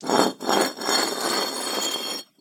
This is the sound of a brick being dragged across a concrete floor. Some suggestions for alternate uses could be a for a large stone door or other such thing.